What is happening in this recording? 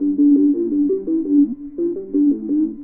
85BPM HFTechno 2
A simple loop @85bpm, made with TS-404. Thanks to HardPCM for the find, this is a very useful loop tool!